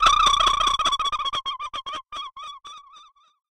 I made this sound in a freeware VSTI(called fauna), and applied a little reverb.
critter, synthesized, alien, creature, space, synth, animal, animals